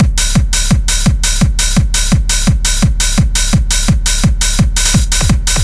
House Loop 170 BPM 8 BARS
bpm, dubstep, glitch, house, kick, snare